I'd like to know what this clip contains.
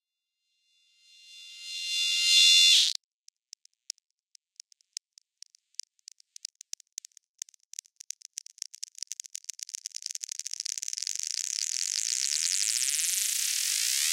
Squelch Saw
effect, bazzile, SFX, lead, squelch, modular, sound-design, synth, FX